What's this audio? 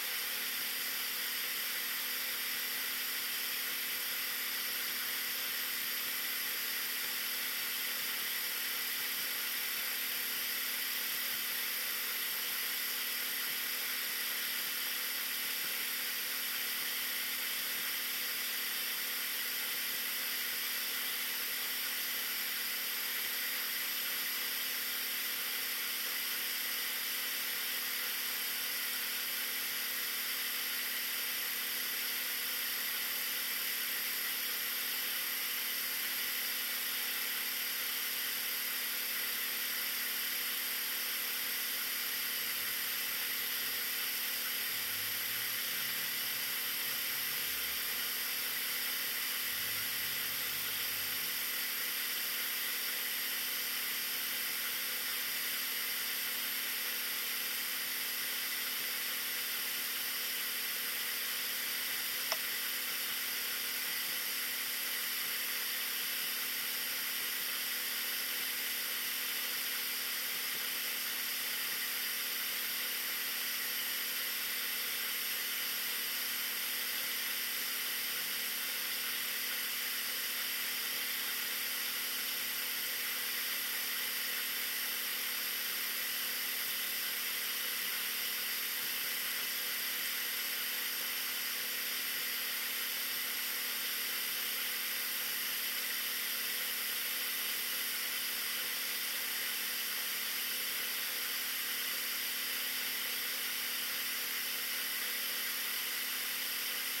cassette voicerecorder play mechanical turning gringing buzz4
voicerecorder, turning, gringing, cassette, play, mechanical, buzz